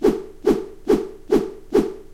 Whoosh For Whip Zoom
A whoosh sound- great for comical whip zooms.
Whip, Whoosh, Zoom